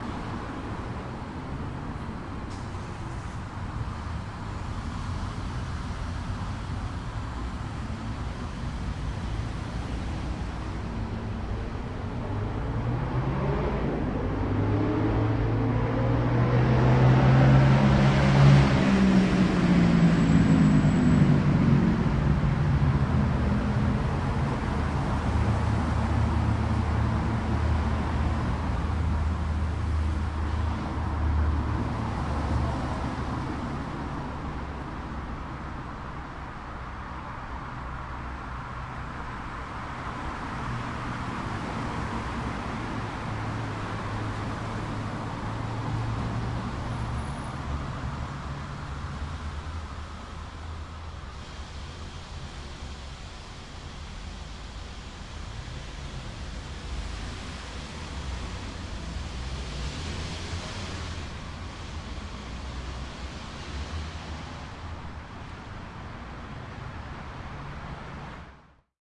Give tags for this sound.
engine
motor
passing

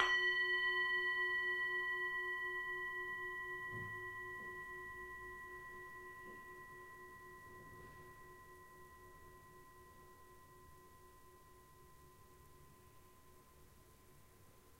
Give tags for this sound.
bell
campane
monastery
temple
tibet

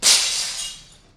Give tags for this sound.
break
field-recording
glass

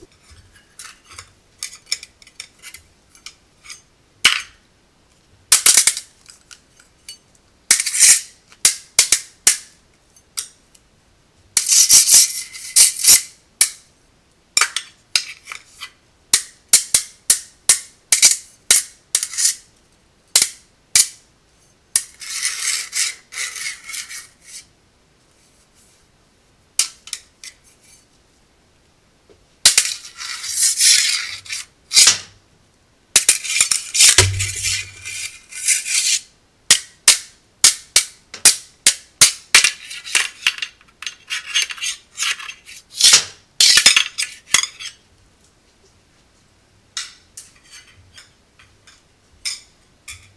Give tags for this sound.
fencing
foils

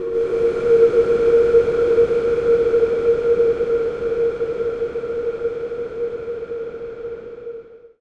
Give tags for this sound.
ambient
atmosphere
blow
industrial
multisample
pad
reaktor

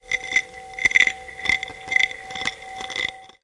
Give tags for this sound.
cereal
feedback

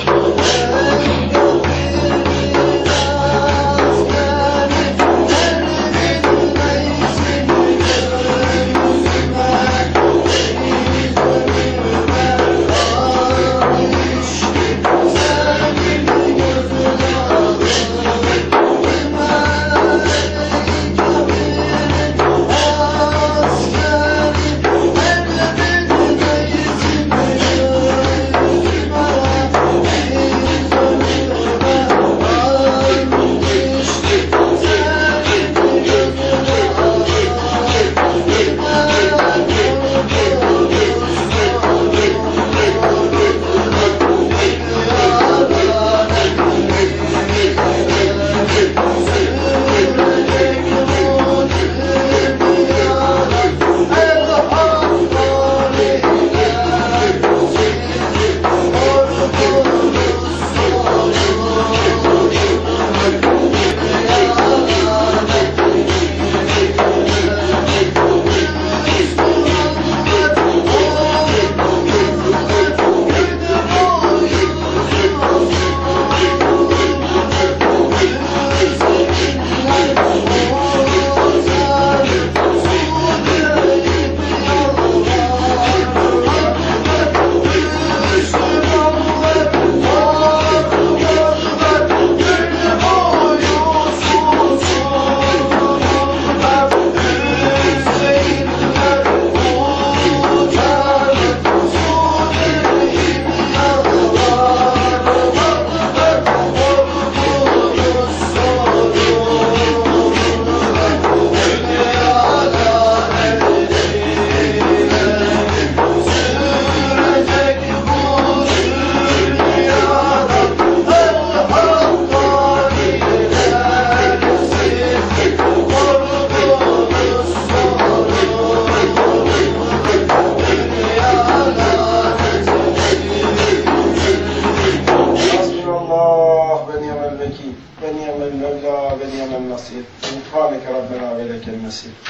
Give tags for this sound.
dergah
naksibendi
sheikh
sofi
sufiislamic
turkey

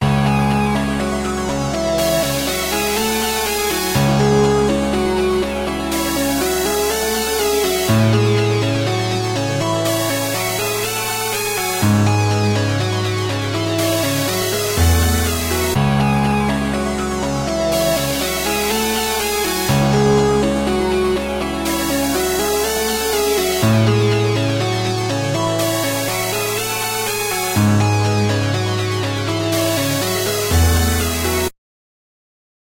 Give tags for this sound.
Spooky
dark
halloween
loop
scary
synths

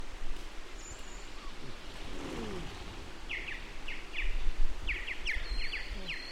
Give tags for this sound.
Asia
Birds
Cambodia
East
Hornbill
Jungle
Nature
South

